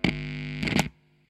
TRS jack inserted into the socket on an electric guitar
socket, guitar, electric